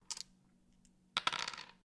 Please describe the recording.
Two-D6 Table2
Two D6 rolling on a table